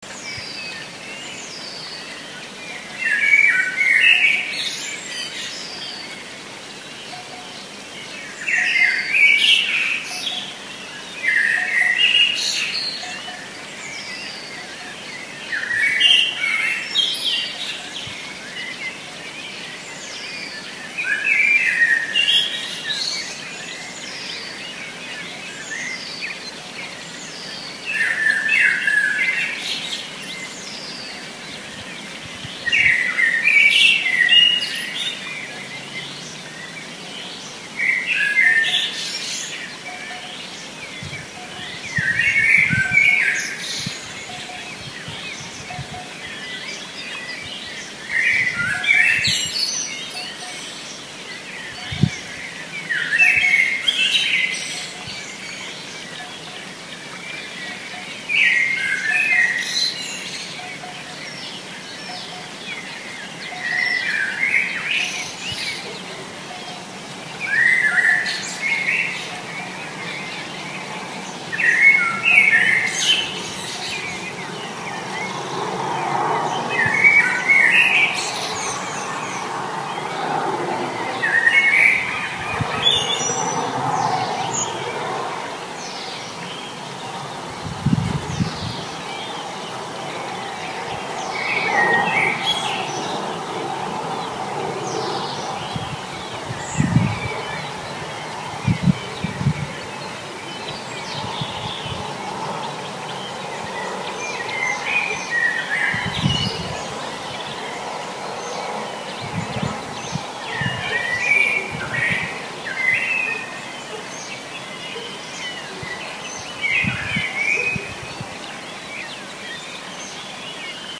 June night
Night bird trills.
birds, night